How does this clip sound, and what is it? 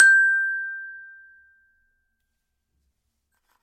Samples of the small Glockenspiel I started out on as a child.
Have fun!
Recorded with a Zoom H5 and a Rode NT2000.
Edited in Audacity and ocenaudio.
It's always nice to hear what projects you use these sounds for.
campanelli, Glockenspiel, metal, metallophone, multi-sample, multisample, note, one-shot, percussion, recording, sample-pack, single-note